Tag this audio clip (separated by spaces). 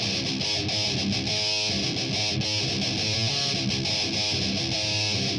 groove guitar heavy metal rock thrash